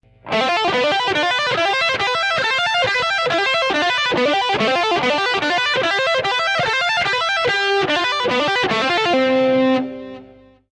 Electric guitar effect 3
I made this effect with a patch from the Boss GT10
Boss, Electric, GT, effect, guitar